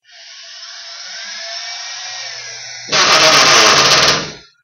Here is a sound created by my uncles battery powered drill while he was remodeling our kitchen. Also don't forget to checkout all of the sounds in the pack.
Battery, Battery-Powered, Carpentry, Construction, Drill, Field-Recording, Powered, Remodeling, Tool